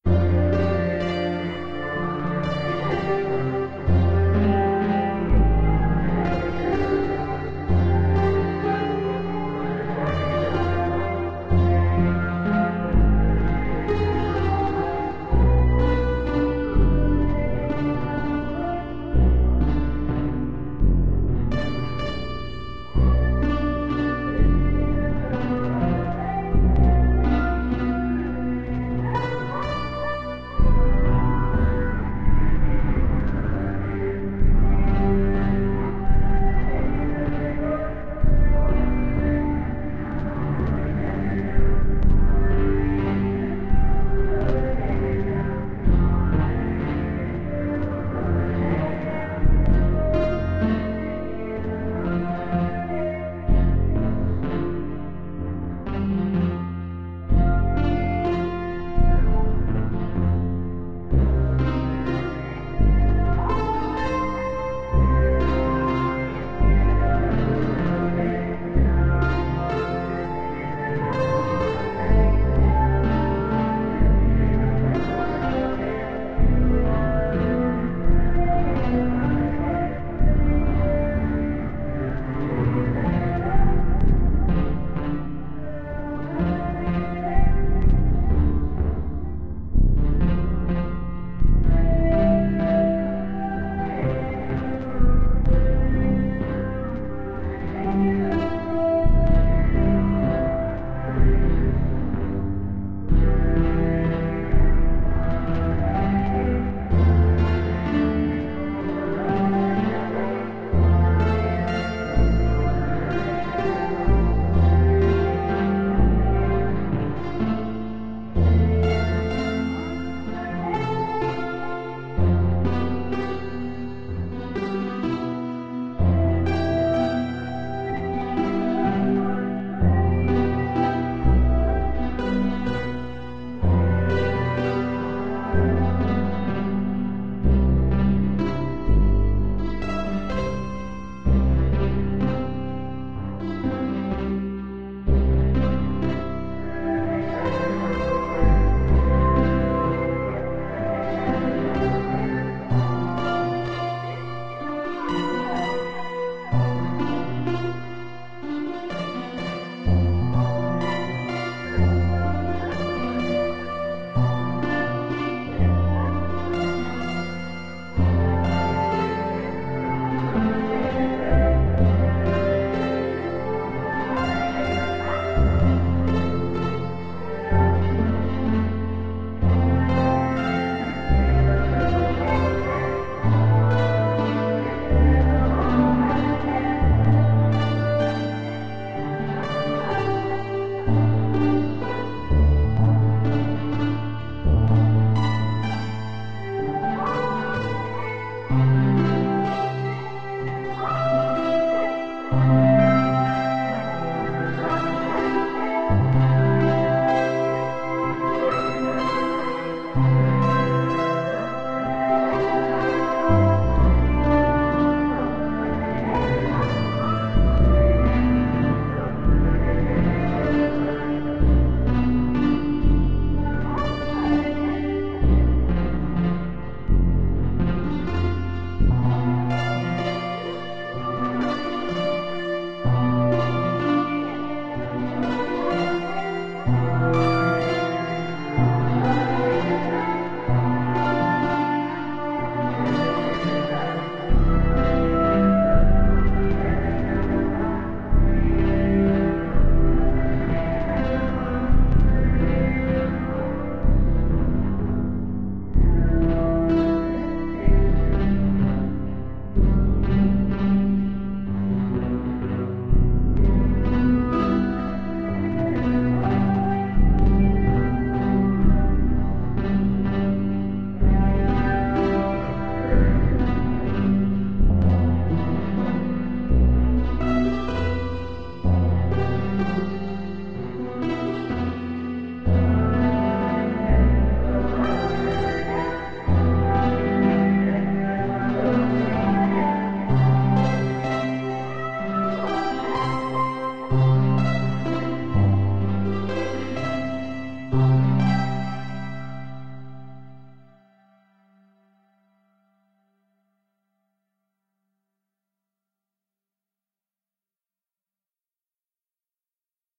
Output from an Analog Box 2 circuit I built that is rather simple in its approach to almost everything. This is a follow-up to ElvenRecoveryBot3_Output_A, which I realized too late actually sounds overly compressed, despite not really having any compression happening in the circuit. So this version is a bit more balanced. The rest here is from the description of the earlier one, mostly.
It almost sounds like some people singing to very simple accompanying bass and, well, something like a plucked string instrument, I don't know exactly what. It isn't trying to sound like anything in particular, but still sounds kind of interesting. The worst part is that it gets kind of boring when the chord root doesn't change for multiple bars (it's controlled by low frequency oscillators and some randomness). Anyway, I posted an earlier version of this on the ABox2 group mailing list (google groups) in May, 2021. But this one's output was post-processed in Cool Edit Pro just to spruce it up.